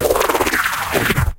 This sound was created by processing my own footsteps with a combination of stuttered feedback delay, filter modulation (notched bandpass + lowpass LFO), and distortion (noise carrier + bit crushing).
drone, engine, factory, futuristic, industrial, machine, machinery, mechanical, motor, noise, robot, robotic, sci-fi